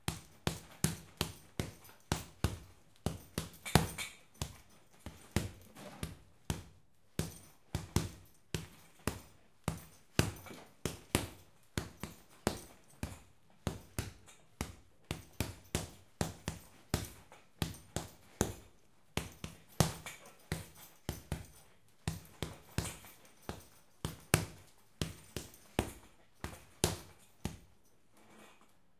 boxing bag in a room
bag, boxe, room, training